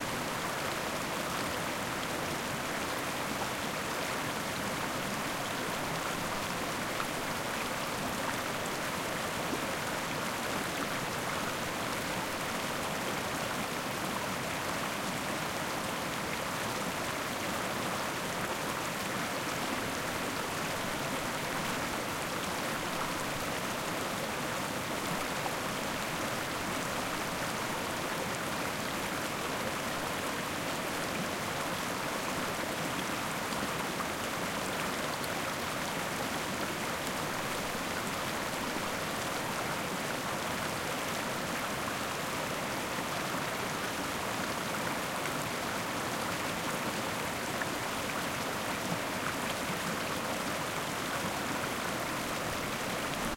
Rio y cascada
Stereo xy zoom h6. Alto El toro, Risaralda.
Cascada, Colombia, Rio, River, Water, Waterfall